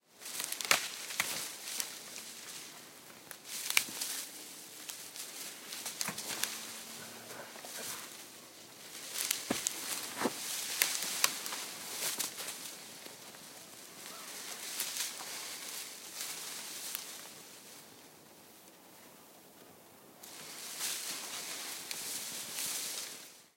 Pine tree branches movment 1
Good use for Christmas tree movement ot dragging out of Xmas lot
field-recording, leaves, wood-branch, foley, tree, Ext